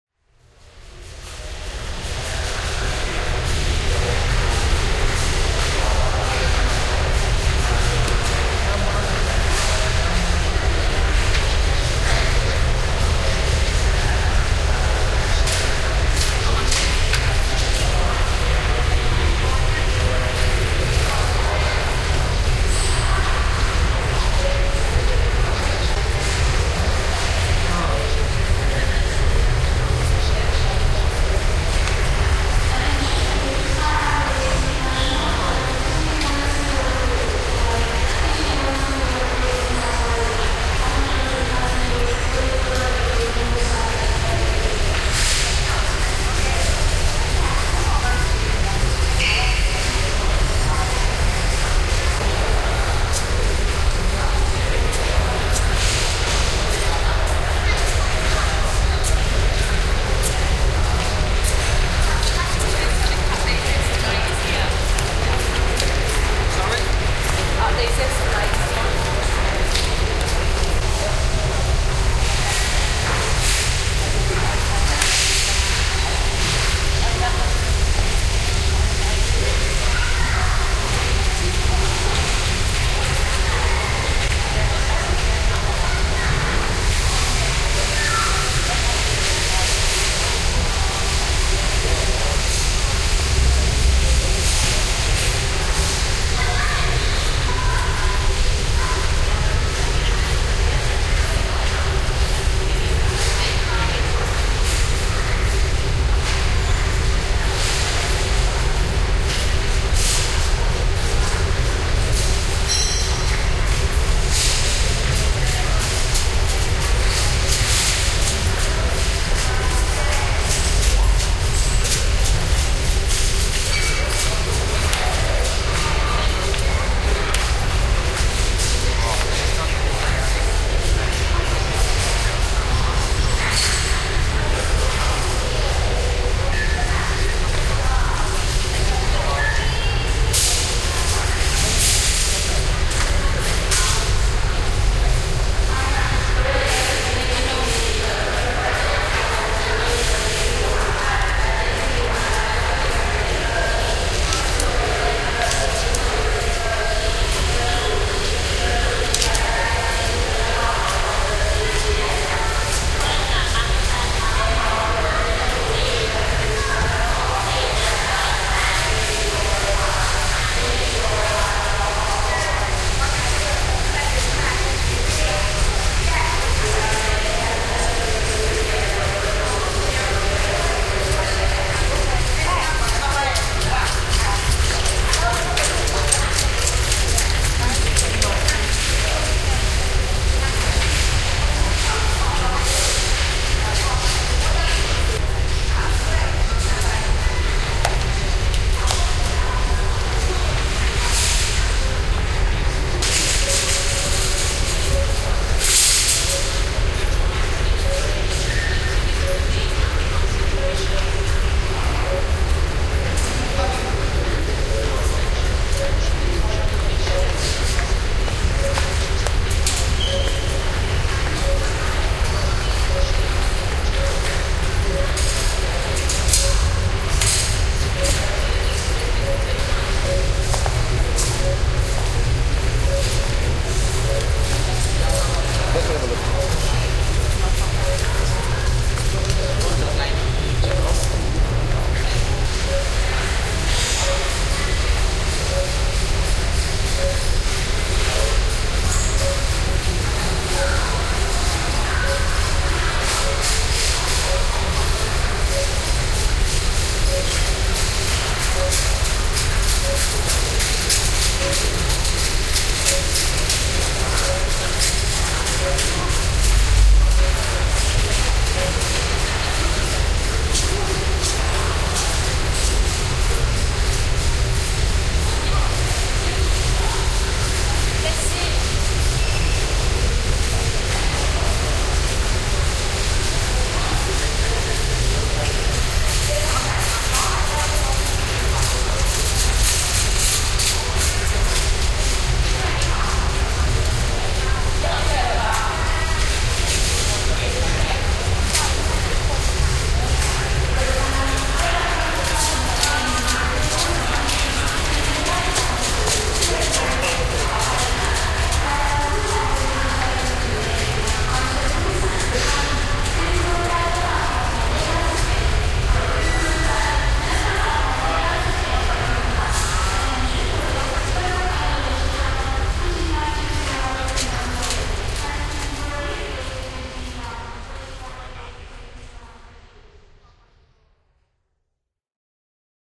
indoor recording of an airport-hall in Bangkok, Thailand
people, announcements, thailand, ambience